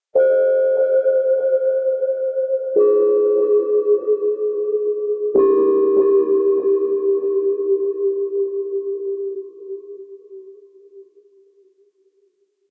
made with vst instruments